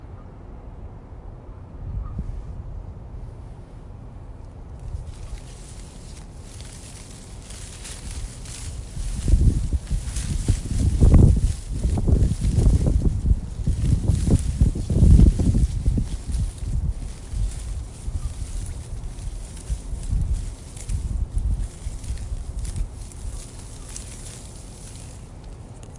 Rustling Leaves
Leaves rustling on a tree in the wind.